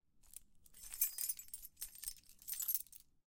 Sound of moving keys
Recorded with Rode NT-1
ting, grab, keychain, cling, jingle, jingling, metallic, rattle, clang, chain, hit, key, drop, metal, keys